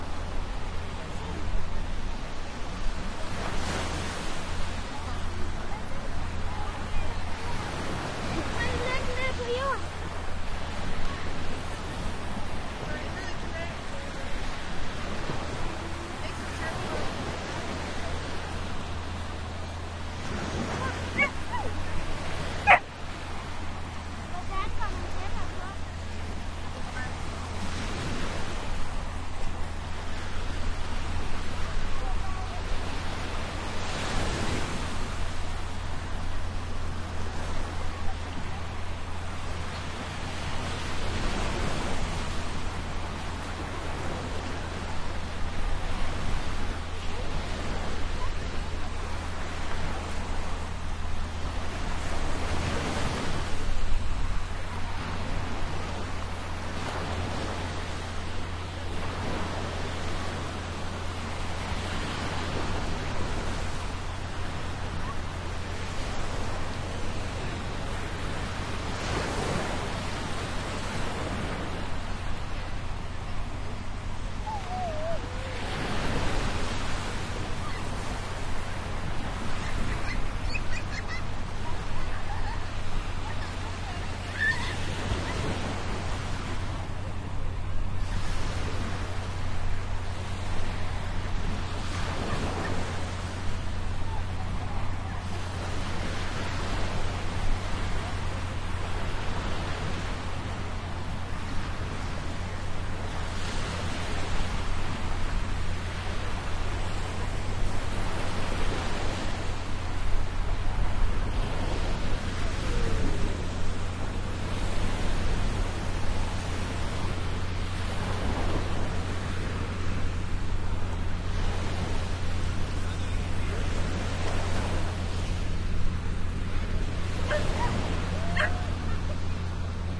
Western shore at skagen branch 07-26
Recorded at the northern tip of Denmark, as far north as you can get. This recording is on the west coast, and is remarkable as it differs very much from the east coast waves from the same area. Sony HI-MD walkman MZ-NH1 minidisc recorder and two Shure WL183